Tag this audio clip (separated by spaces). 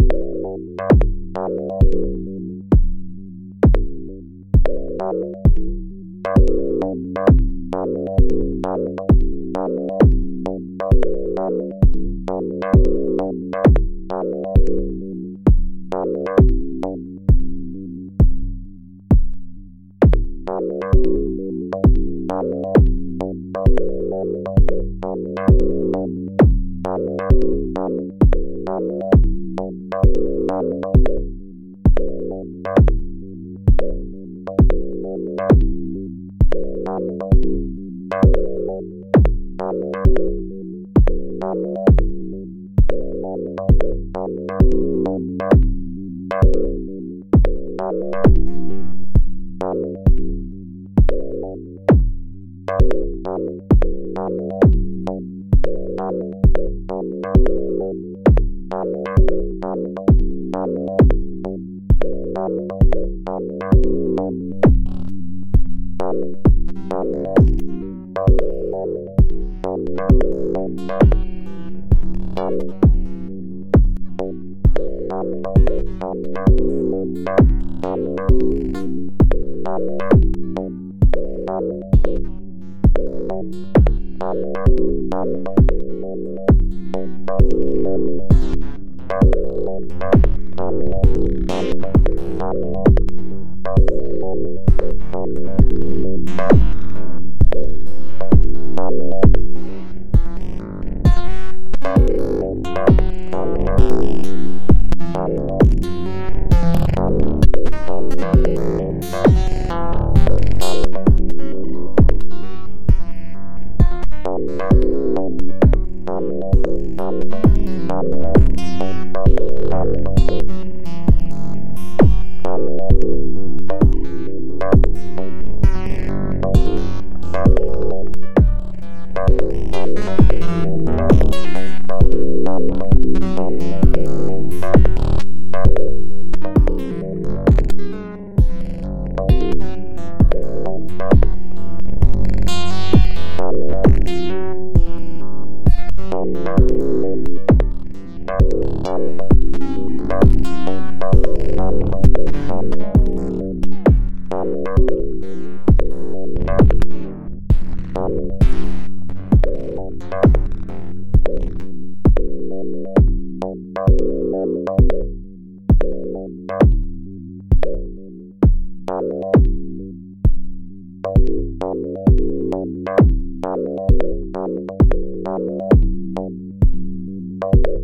modular digital beat bass